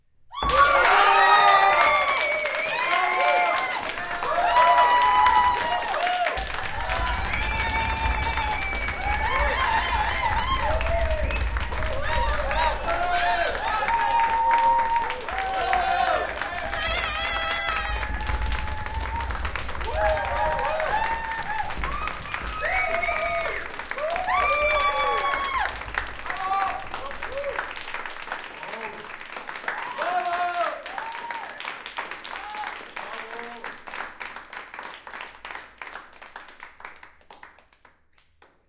Applaus - kleines Theater 2
Applause in a small theatre
Version 2
applause, theatre